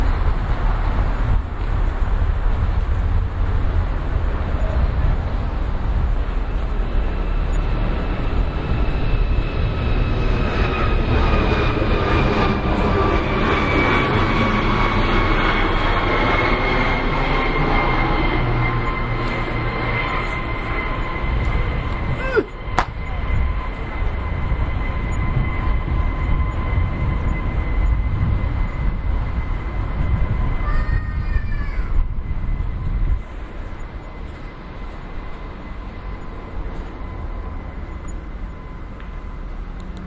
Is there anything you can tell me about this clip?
Earls Court - Aeroplane overhead